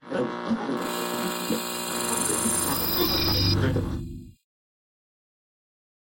radio shudders8x
grm-tools, radio, sound-effect, shudder